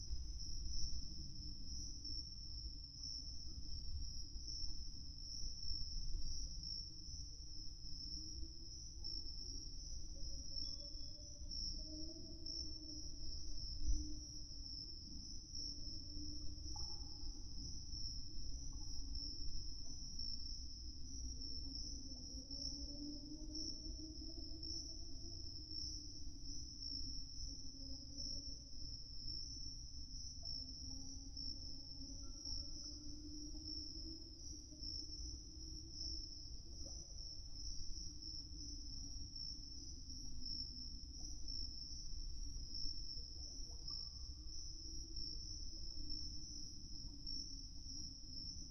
Tascam DR05 recording of crickets, a bit of noise reduction from Audition
crickets, field-recording, distant-urban-noise